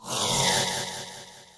A sound a dragon makes when sick.